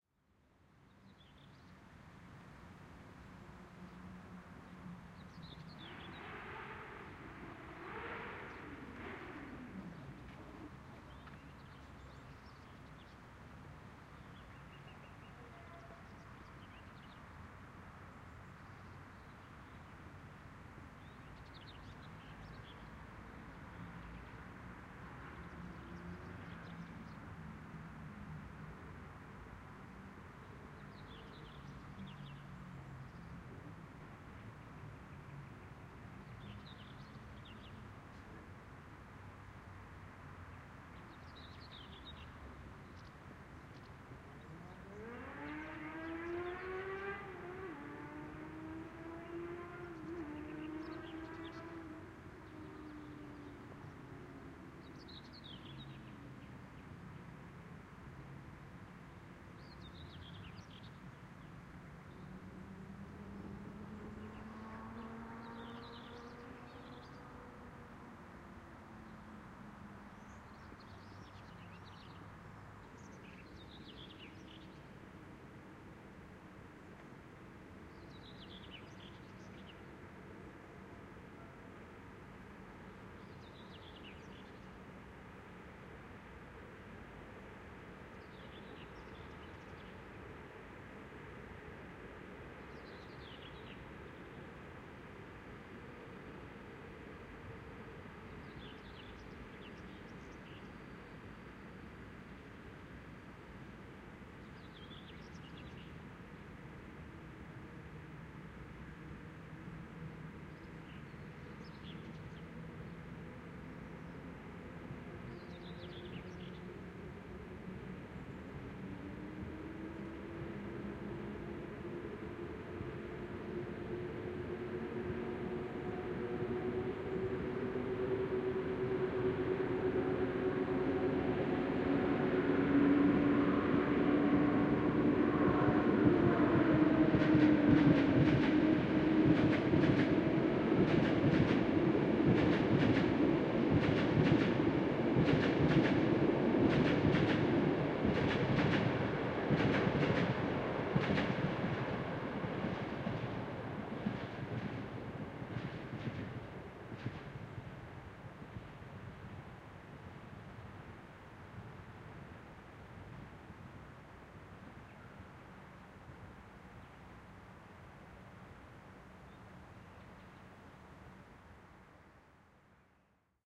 picnic at the back HCP 090613
09.06.2013: about 12.00. Poznan in Poland. Meadow between HCP factory on 28 czerwca street and railway track, behind Hetmanska street in a Debiec district direction. Gentle, lazy ambience of meadow. Some distant sounds of passing by motobies, trains are audible.
Marantz PMD661 MKII + shure VP88 (paramteric equalizer to reduce noise, fade in/out)